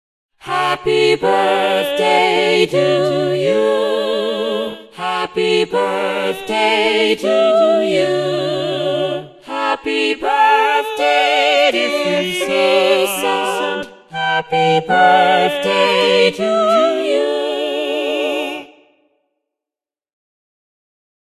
The sound uses choir synthesis technology developed for the TROMPA EU project. This means that what you hear are not real singers but synthesized voices generated by a computer!
birthday, happy-birthday, synthetized, voctrolabs